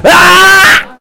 Man screaming being murdered (not for real, of course).
scream, yell, man, murdered, pain, death, 666moviescreams